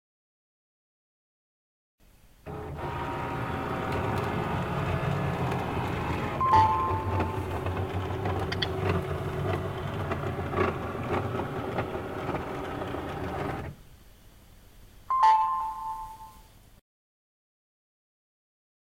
22 printer, scan
humming of a printer while scanning a document
Czech, hum, scanner, printer, office, Panska